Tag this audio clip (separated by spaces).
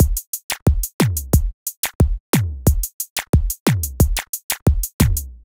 loop drum